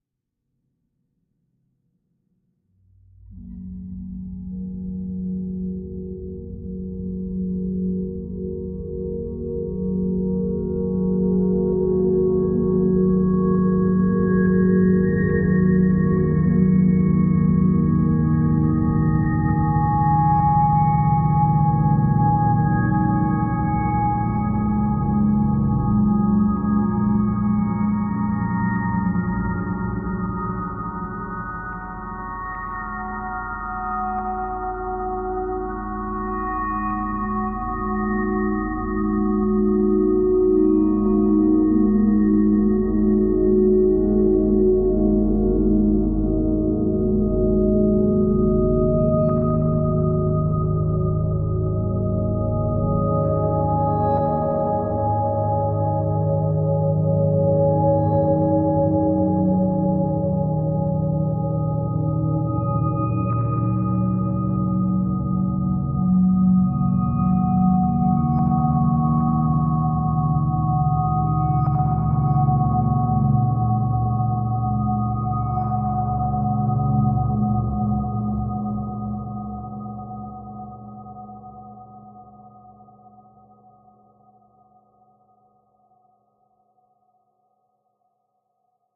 Rhodes, reverb, echo. I tell you all my secrets through sounds.